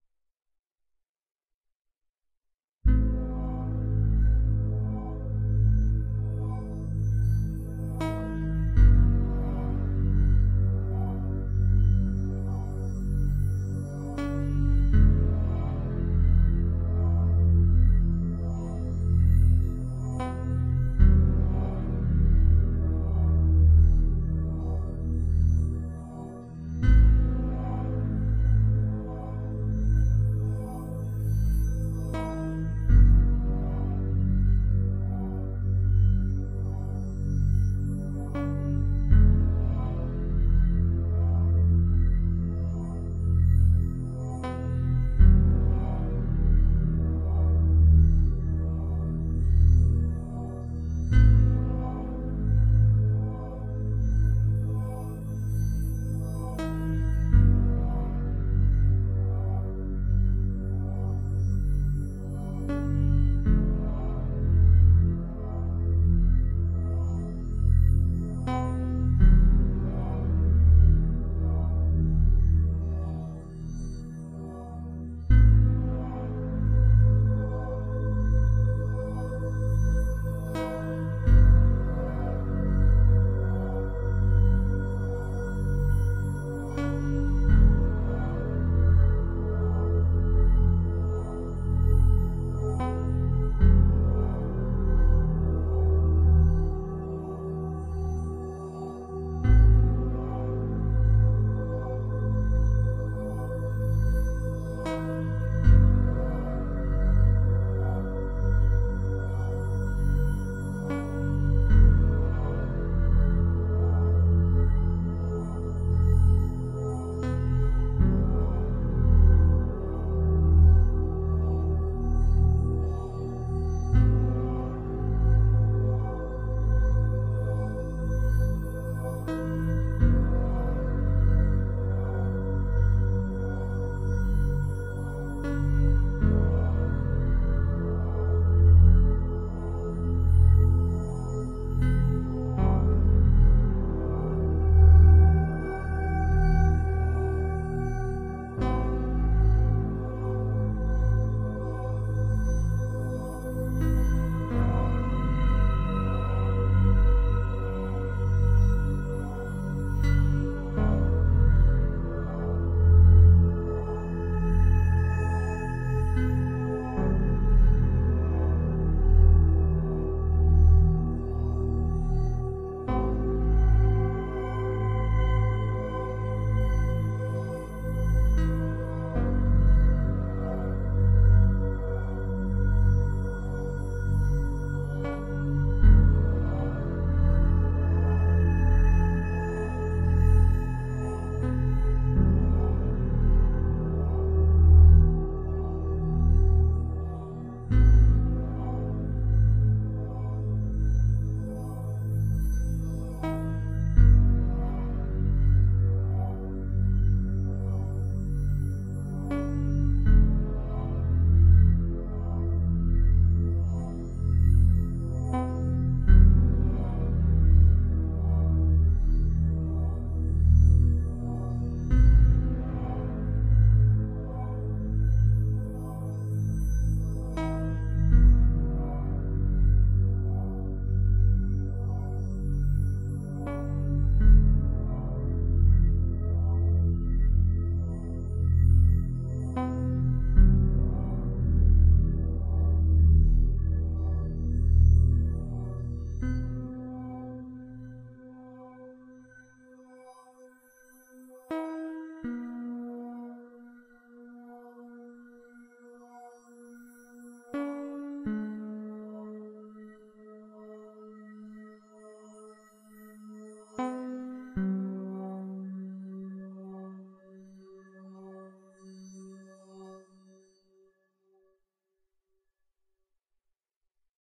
relaxation music #39
Relaxation Music for multiple purposes created by using a synthesizer and recorded with Magix studio.
meditation, relaxation, meditative, relaxing